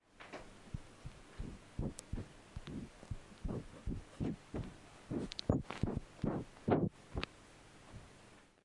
14 cat blowing
Cat is blowing. NO more. Just that. Get with that! (Jay-Z would agree, that rhyme)